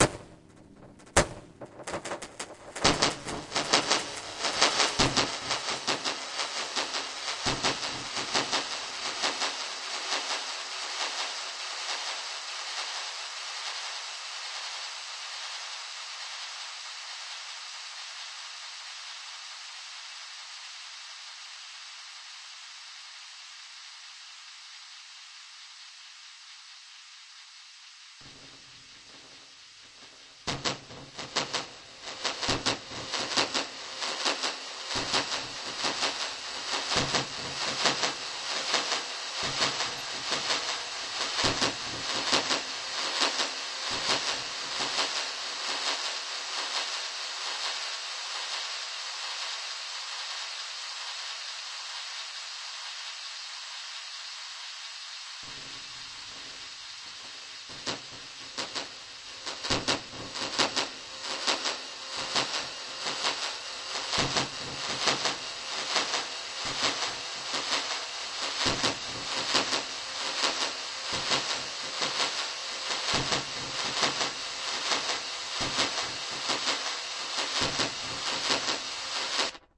dub drums 006 spacefill delayz
up in space, echomania
drums, dub, experimental, reaktor, sounddesign